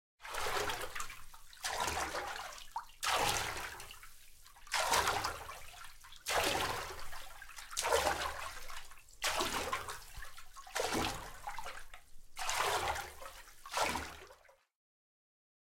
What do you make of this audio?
09 Swimming - Very Slow
Swimming very slowly.
CZ, Czech, Hands, Pansk, Panska, Slow, Sport, Swimming